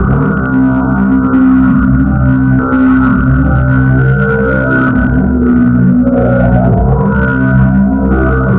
This is a selfmade Picture-Collage that i triggered in fruityloops beepmap.
(b5 at 64 steps 133,333bpm,but prog called me it was the c5)
after triggering i routed the signal to a group of fx:
parametric eq,the panomatic fx a 2nd parametric eq.after those the equo and a vocoder.then i added a fx-plugin which is called e-fex modulator,a stereo enhancer, limiter,compressor and noisegate.
also friendly greetings from berlin-city,germany!